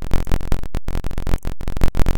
8bit waveform
8 bit audio snippet created by waveform manipulation in audacity.